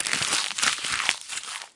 ppk-crunch-16
Short sound of paper being handled. Part of a percussive kit with paper-sounds.